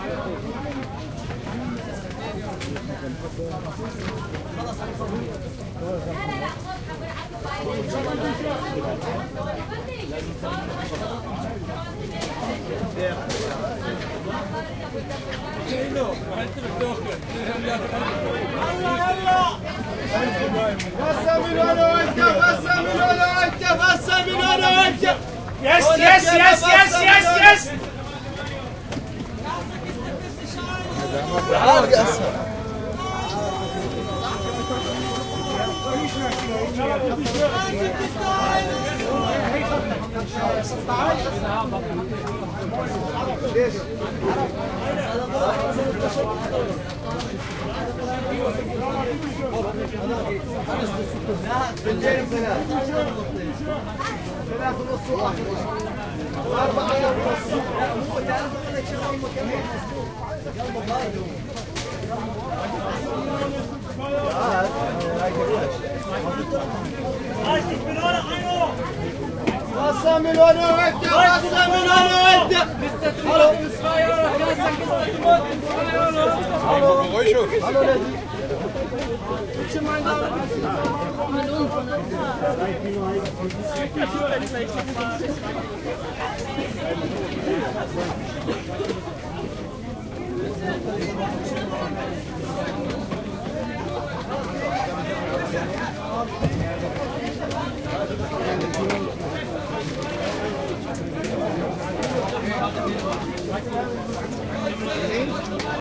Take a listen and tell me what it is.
weekly market [1]
The weekly market in Leipzig at the Sportforum. You can buy a lot of very cheap fruits and vegetables. A nice mixture of different cultures and people. You can hear mostly german and arabic speaking people, screaming, singing, talking ...
The loudest barker sell the most? Hm.
arabic, barker, crowd, jam, market, melee, people, pitchman, screaming, street, talking